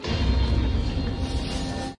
A trip to the movies recorded with DS-40 and edited with Wavosaur. Snippet of sound from a Public Service Announcement.
ambience, theater